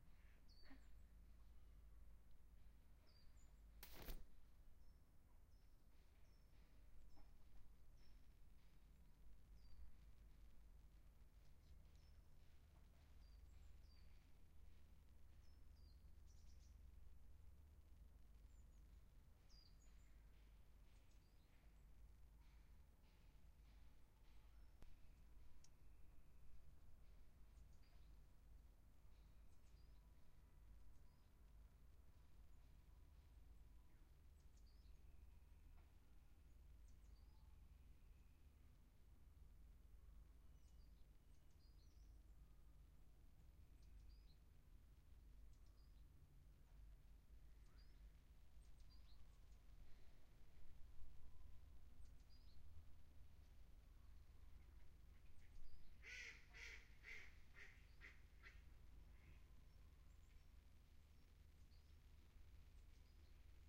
chapinhar lgo patos 01
Porto, water, lake, ducks, ulp-cam, vegetation, natural-park, Parque-Serralves